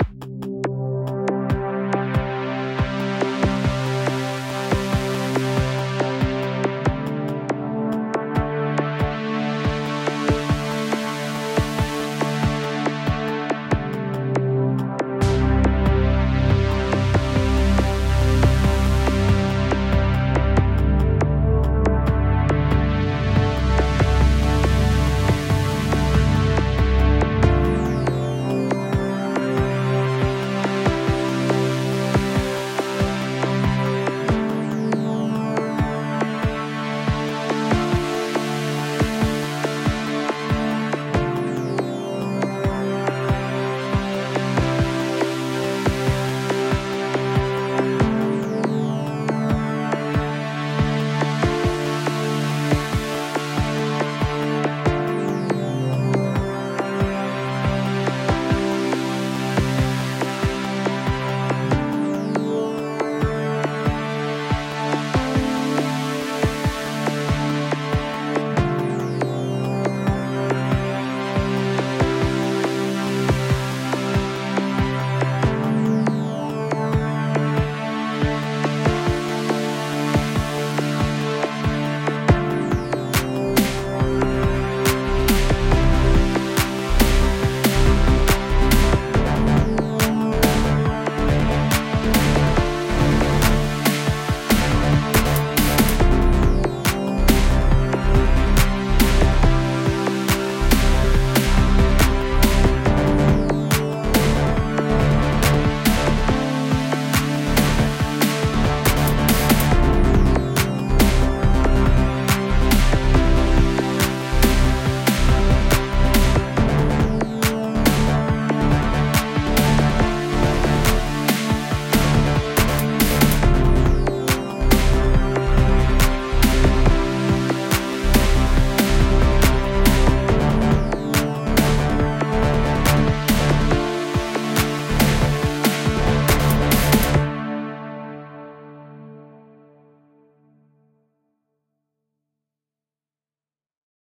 Space blueberry picking music - helps you to find the berries on distant planets
soundtrack, ambient, music, synth, electronic